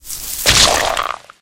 Hidden Spike Trap
The player falls into a deadly trap of spikes. OUCH!